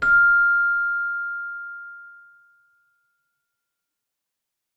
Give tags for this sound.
bell; celesta; chimes; keyboard

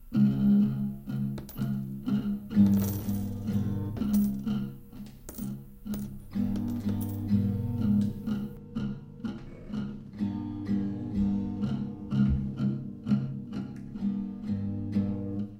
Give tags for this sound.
broken; creepy; guitar

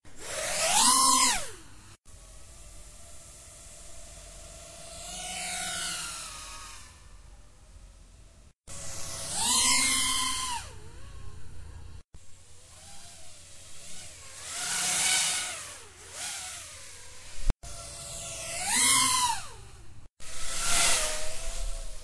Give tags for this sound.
drone-whoosh; fly-by; flyby; freestyle-drone; freestyle-quadcopter; quadcopter

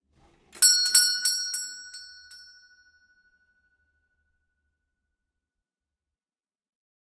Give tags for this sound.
Store; Pull; Doorbell

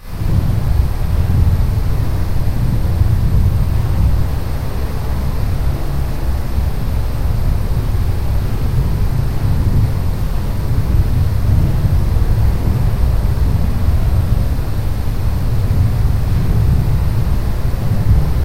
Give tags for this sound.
weather; gusts; wind; rainfall

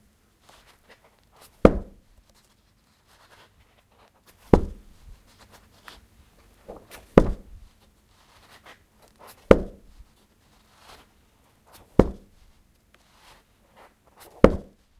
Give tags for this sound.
Book; Close; Open; ZoomH1

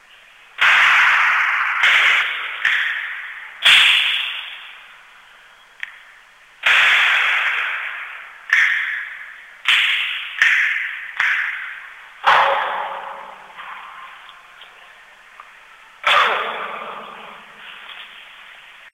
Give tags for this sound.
acoustics; centre; Field-recording

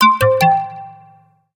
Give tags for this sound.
warning; cute

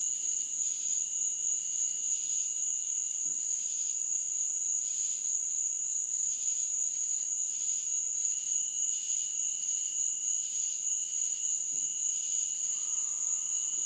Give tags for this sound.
hot; nature; birds; jungle; frogs; USA; insects; summer; insect; Beaufort; field-recording; South-Carolina; humid; day; night; semi-tropical; forest; low-country; tropical; crickets; cicadas

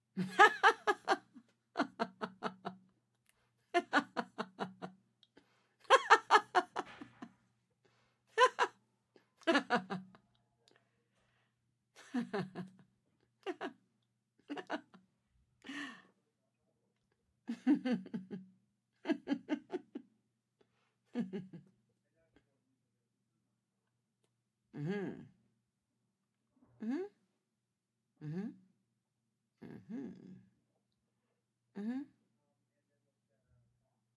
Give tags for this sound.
giggling; happy; lady; laughing; woman

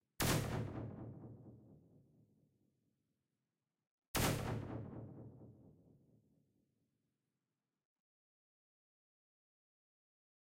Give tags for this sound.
laser; sci-fi; space

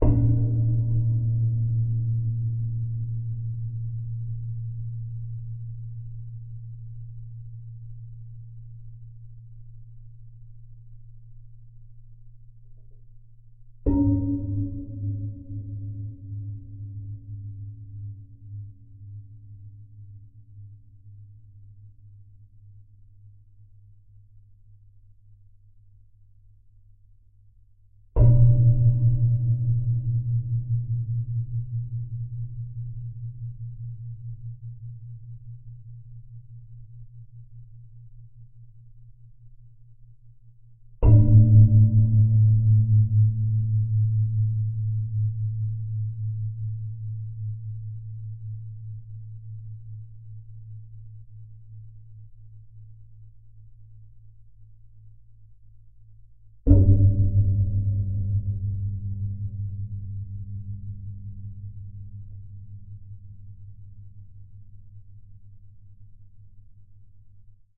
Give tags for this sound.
percussion; PCM-A10; drone; perc; clothes; contact-microphone; dryer; sony; household; laundry; contact-mic; bass; geofon; sound-fx; home; low-frequency; washing; resonant; hanger; weird; lom; resonance

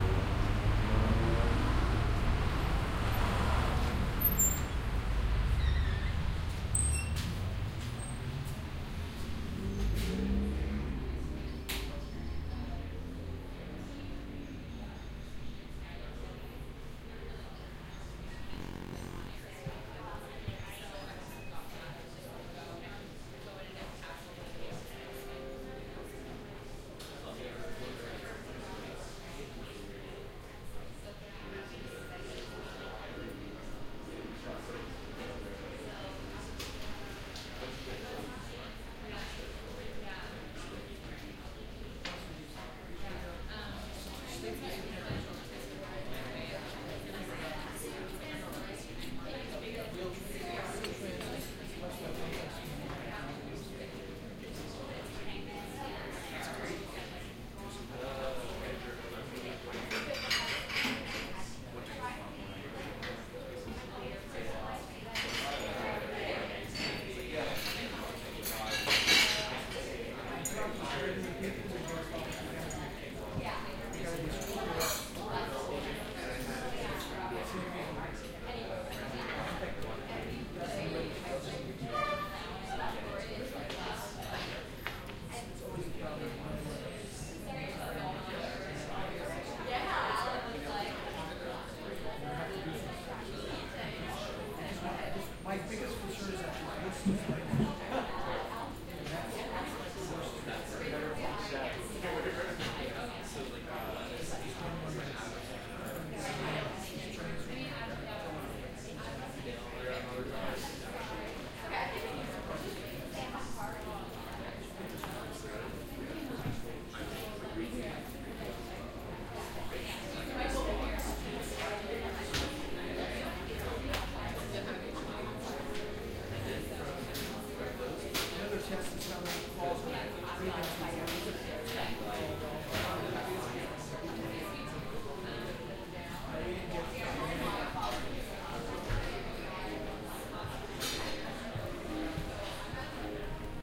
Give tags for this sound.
binaural; coffee; coffeehouse; coffeeshop; conversation; crowd; field-recording; people; stereo; tea; teashop; walla

